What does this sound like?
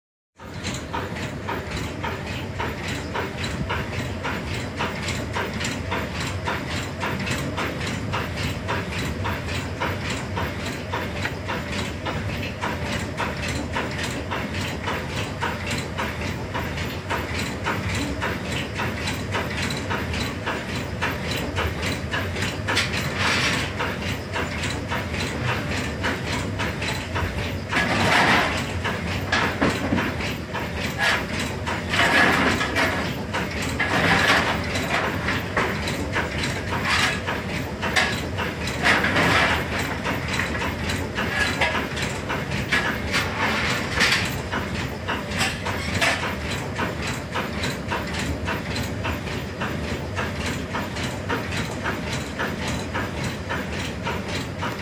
VIC 32 engine room
Recorded in the engine room of VIC 32 as the steamer travelled along the Caledonian Canal
boat, compound, engine, room, steam